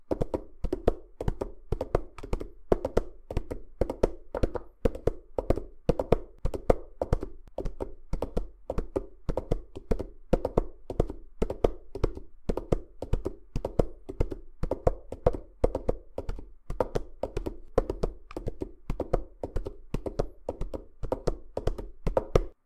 SFX - Horse - Trot
Sound of trotting horse made with cups on soft pad in old-fashioned radio show style